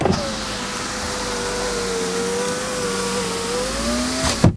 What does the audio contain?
car electrical window 2 button closes
variation 2) I press and hold the button to close my car window, then I release it.
Recorded with Edirol R-1 & Sennheiser ME66.